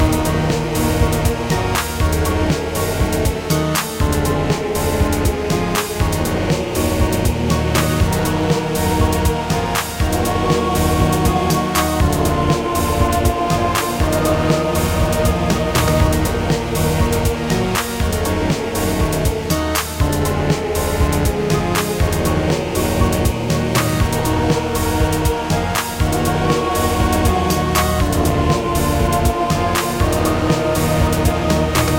Short loops 10 03 2015 4
made in ableton live 9 lite
- vst plugins : OddlyOrgan, Balthor,Sonatina choir 1&2,Strings,Osiris6,Korg poly800/7 - All free VST Instruments from vstplanet !
- midi instrument ; novation launchkey 49 midi keyboard
you may also alter/reverse/adjust whatever in any editor
gameloop game music loop games organ sound melody tune synth piano
piano, sound, organ, melody, game, tune, loop, games, synth, music, gameloop